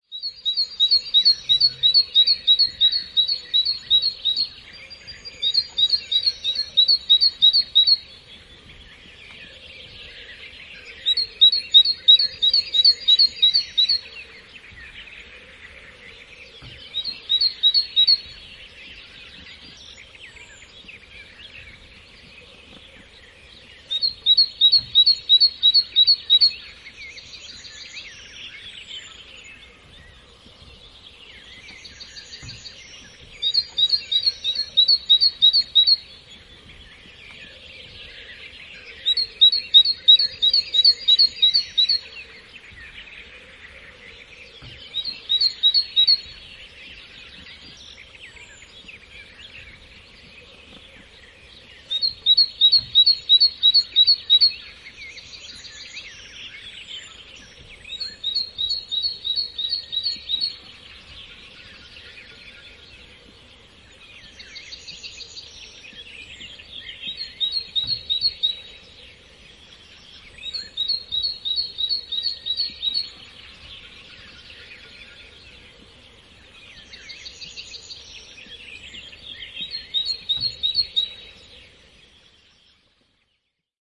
Talitiainen laulaa kaksitavuista laulua metsässä, taustalla muita lintuja. (Parus major).
Paikka/Place: Suomi / Finland / Kitee, Kesälahti, Ruokkee
Aika/Date: 07.06.2000